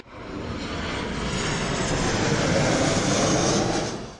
avió aeroport del prat n&n
A plane passing over the delta of Llobregat. Recorded with a Zoom H1 recorder.
Deltasona, Llobregat, airplane, plane